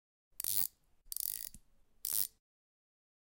short audio file of a ratchet clicking as you turn the head
tool, ratchet, wrench, owi, clank